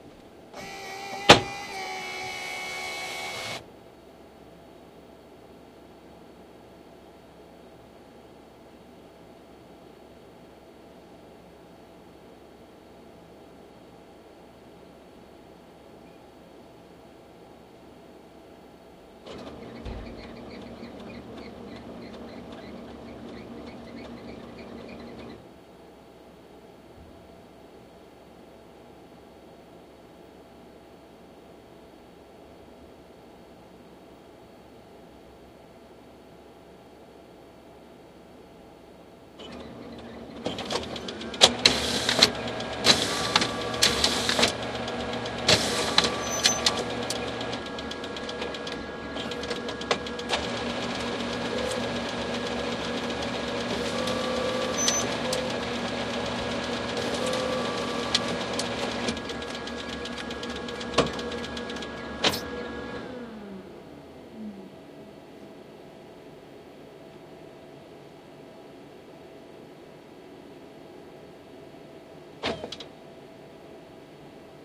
The sound of an industrial photocopier recorded from within the A5 tray whilst printing some flyers.
Office, Photocopier, Printing